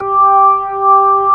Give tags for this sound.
b3 organ tonewheel

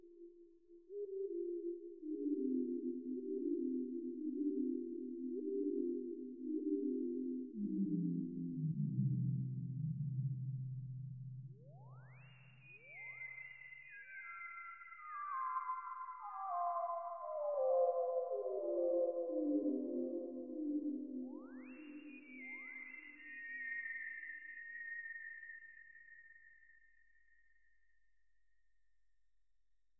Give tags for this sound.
fi sci

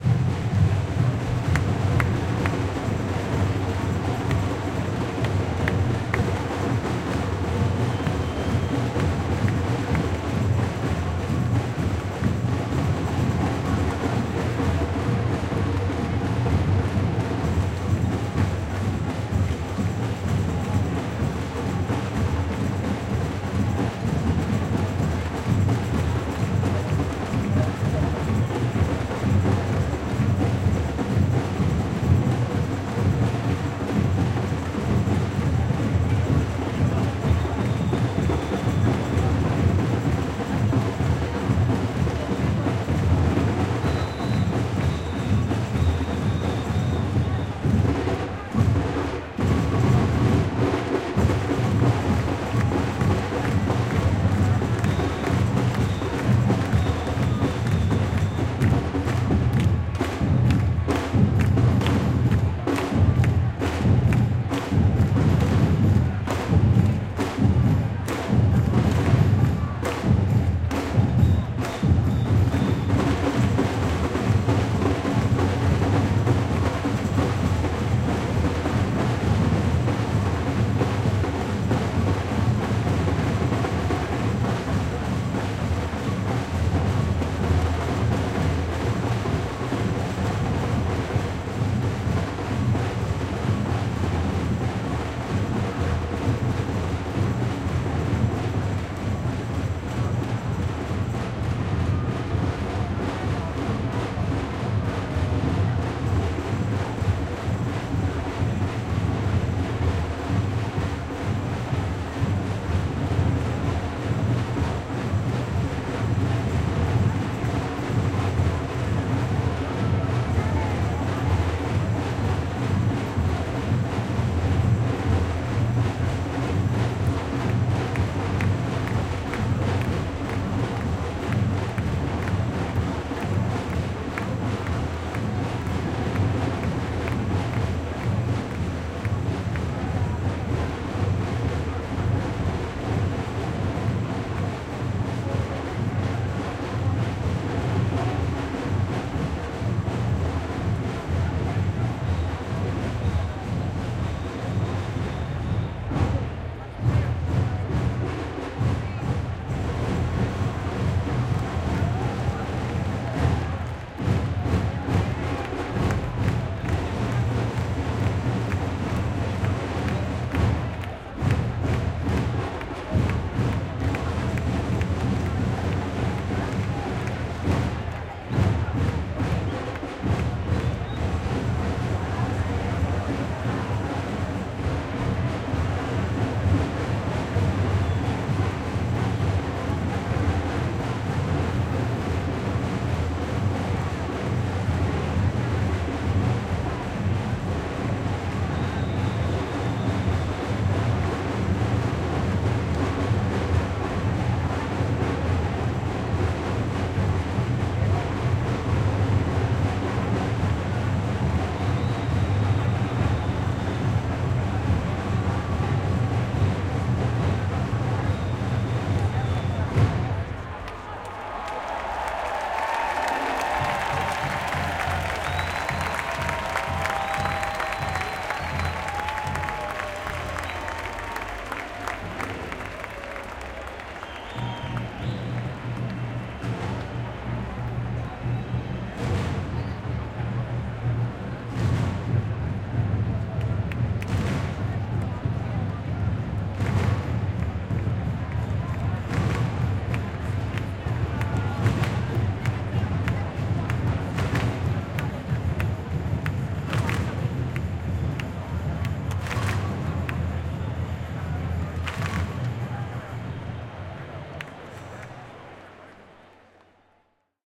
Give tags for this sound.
2021 barcelona catalunya de Manifestacio marcha people protesta septembre street

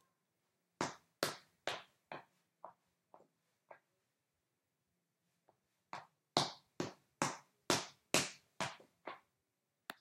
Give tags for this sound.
pasos caminar steps walking caminando walk shoes hombre man

